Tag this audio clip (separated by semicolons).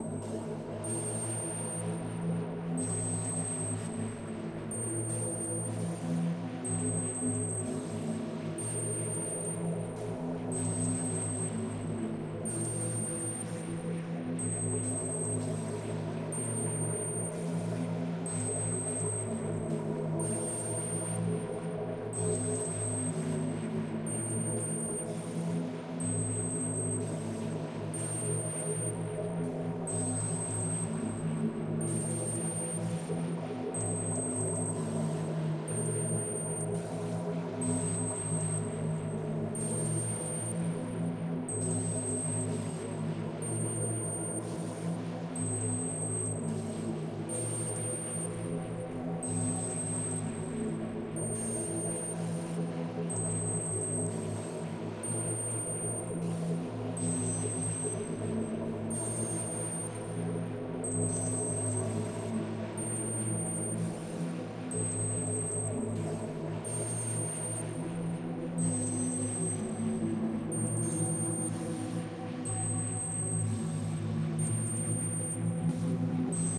electricity; ambient; synth; analog; dreaming; doepfer; background; eurorackmodular; noise; drone; dream; synthesizer; electro; atmosphere; sound-design; minimal; synthesis; ambiance; self-modulation; com; electronic; buchla; glitch; dreadbox; experimental; dronemusic